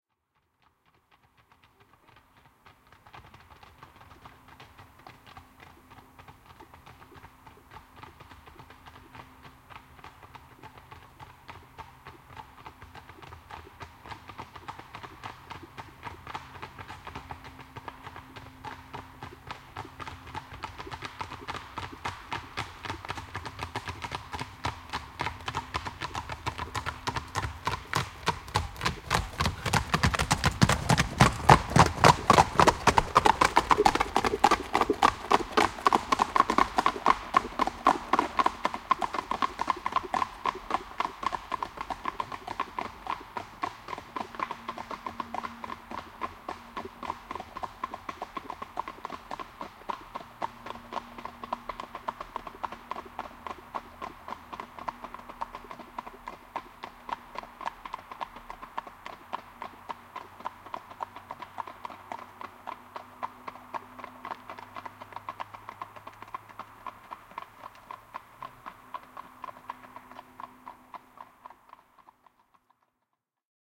Hevoset ohi, kaviot / Two horses passing by at a trot on asphalt, hooves clattering
Kaksi hevosta ravaa ohi asfaltilla, kavioiden kopsetta.
Paikka/Place: Suomi / Finland / Kitee, Sarvisaari
Aika/Date: 12.07.1982
Hooves, Steps, Horses, Suomi, Ravi, Trot, Hevonen, Kaviot, Askeleet, Tehosteet, Clatter, Yleisradio, Finland, Yle, Hevoset, Field-recording, Soundfx, Trotting, Kopse, Finnish-Broadcasting-Company